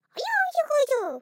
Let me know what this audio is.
monstro feito por humano - human voice
monstro, monstrao, bicho, monster, bichao, monstrinho, bichinho